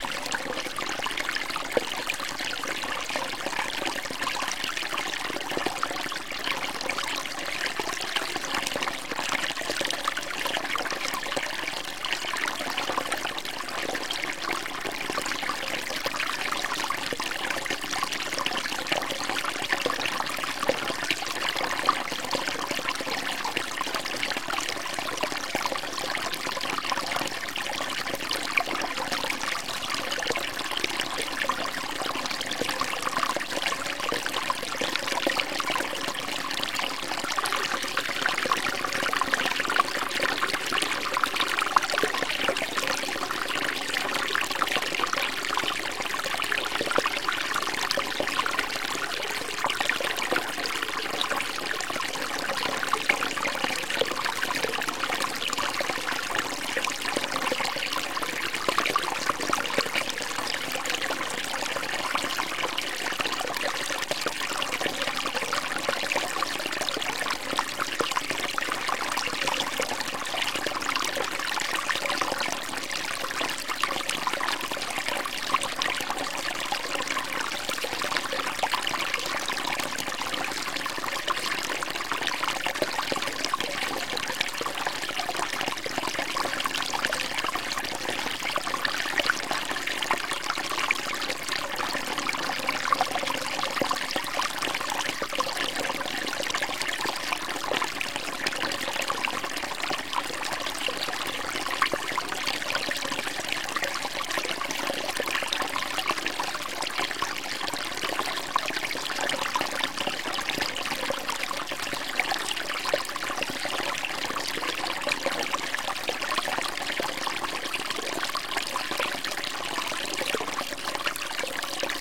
national water 03
One in a series of recordings of a small stream that flows into the Colorado River somewhere deep in the Grand Canyon. This series is all the same stream but recorded in various places where the sound was different and interesting.
noise, relaxing, stream, water, loop, ambient, relaxation, flow, trickle, river